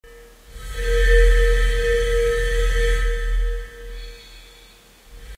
blowing over the 1.5 inch opening of a 5 gallon glass bottle, microphone placed inside of the bottle.
blow, bottle